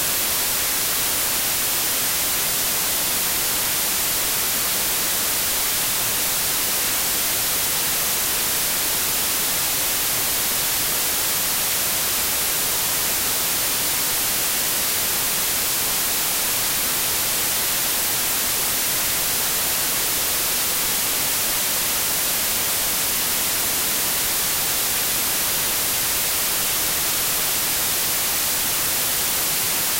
Simple static generated in Audacity.